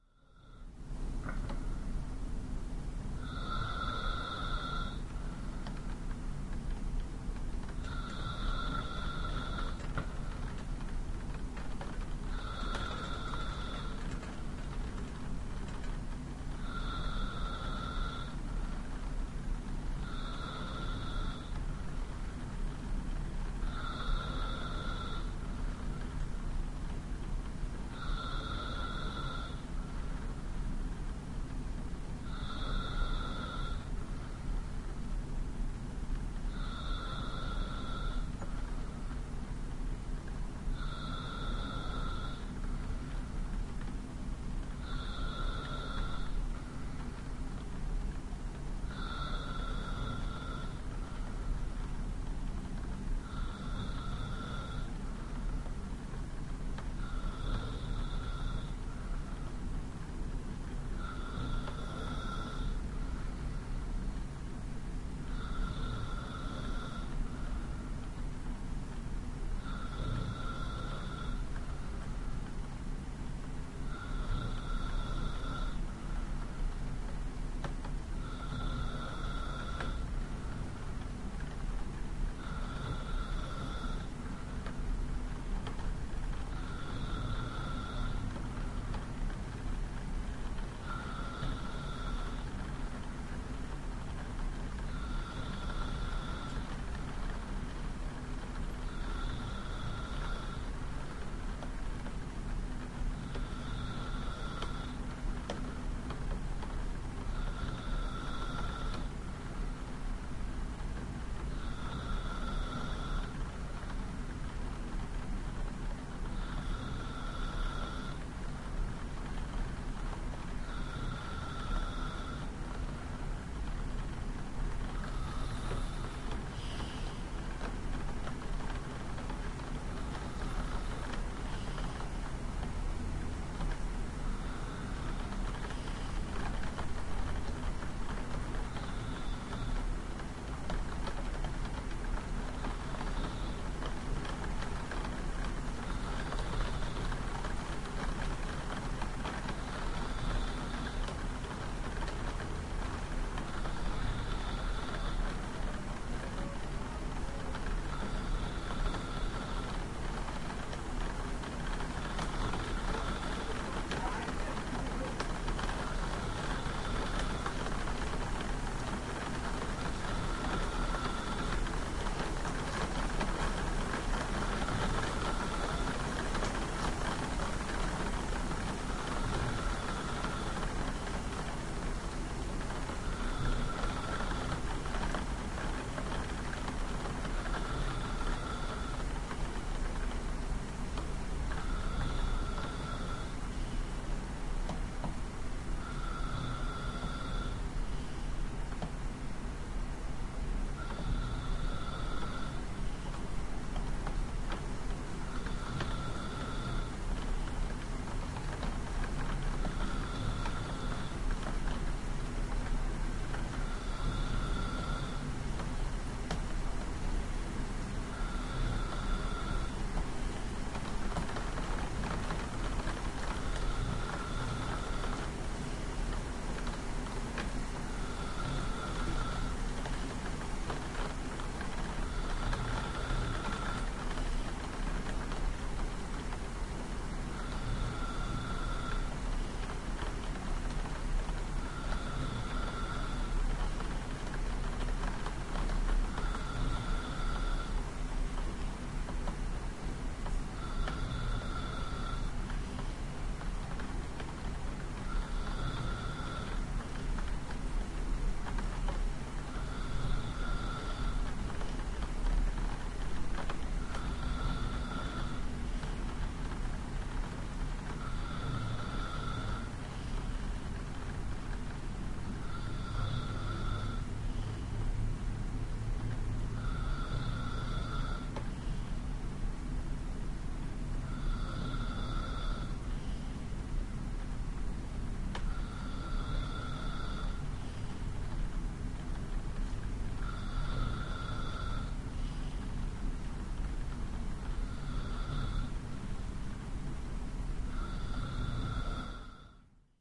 It starts raining. You hear it ticking against my window and on the metal window sill outside. The rain increases after a while and as it does you hear some voices down on the street. I am asleep, you can hear that as well. I switched on my Edirol-R09 when I went to bed. The other sound is the usual urban noise at night or early in the morning and the continuously pumping waterpumps in the pumping station next to my house.
bed, body, dripping, drops, field-recording, human-voice, nature, rain, street, street-noise